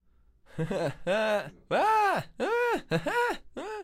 A buch of different tones of expressions.